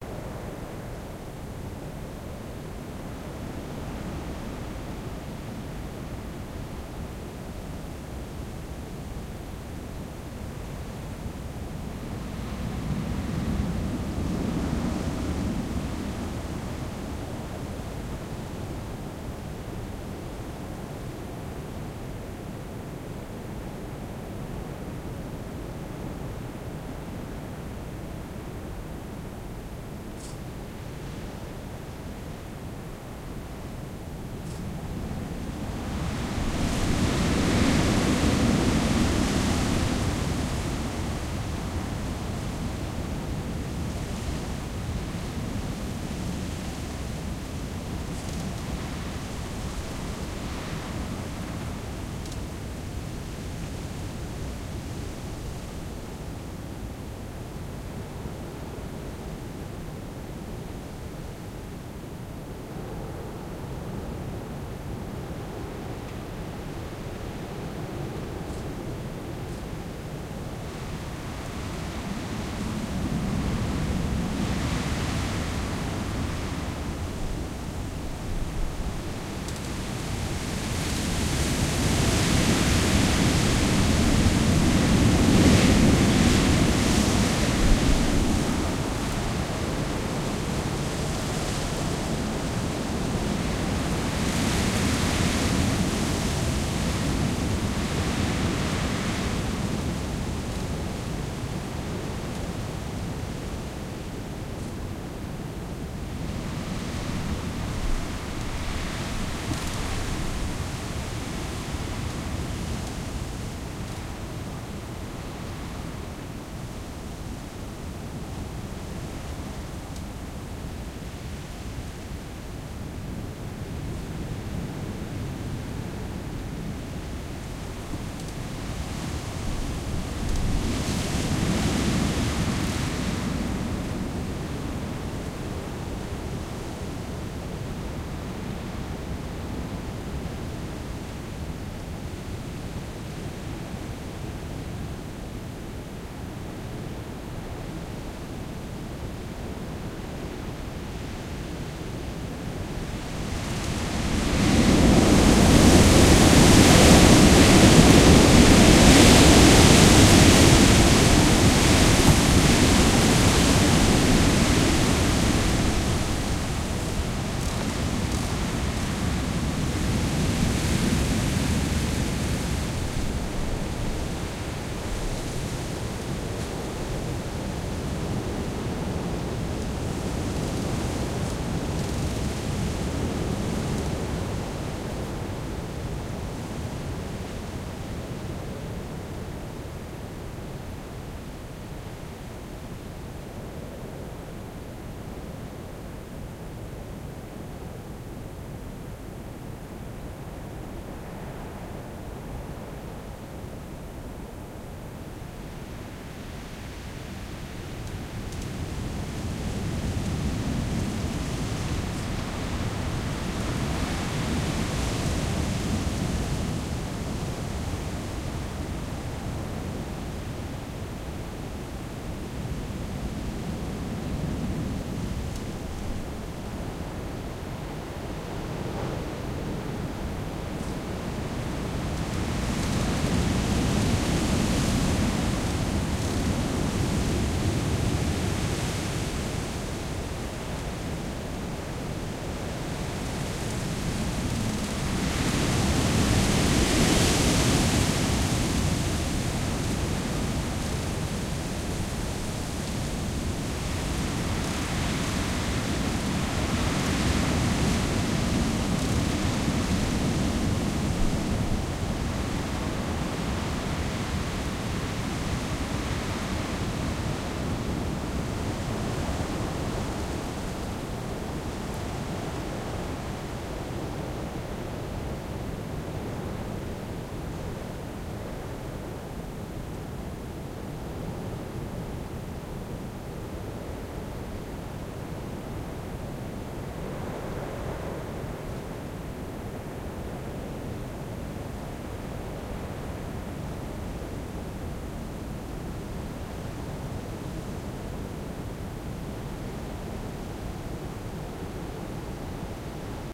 Stormy winds through the trees
High winds over night recorded from the bedroom window. Trees opposite are 60 ft Tall and bare. Wind gusts at peak 35-40 MPH.